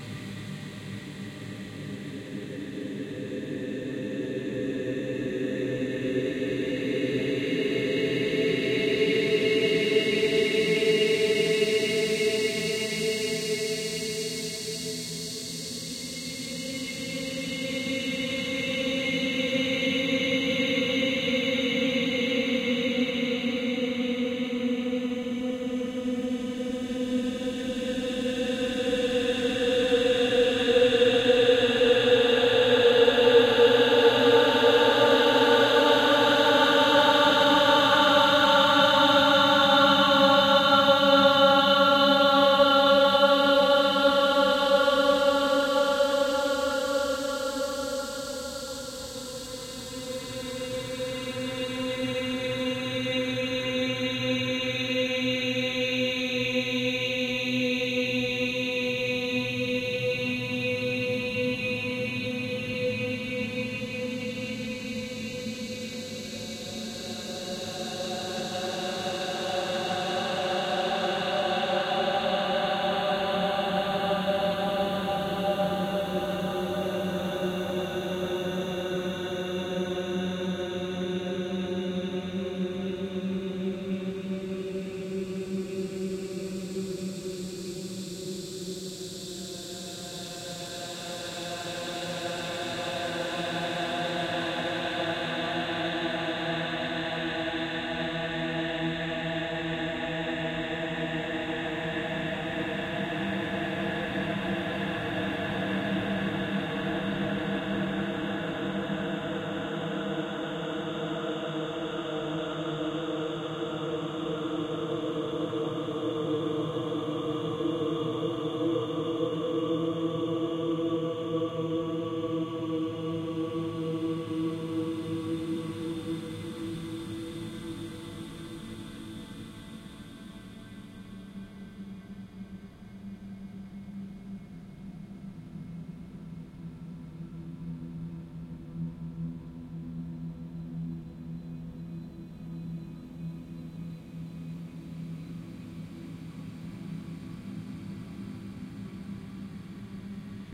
Long Scary Drone 2
Another sound I recorded and have Paul Stretched. The original sound was a local man playing guitar and singing.
ambient, anxious, background-sound, bogey, creepy, drone, haunted, phantom, scary, sinister, spooky, suspense, terrifying, terror, thrill, weird